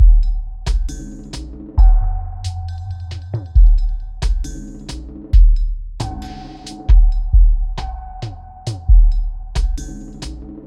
Produced for ambient music and world beats. Perfect for a foundation beat.